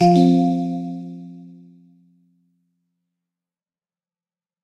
Generic unspecific arftificial sound effect that can be used in games to indicate something finished or a message was received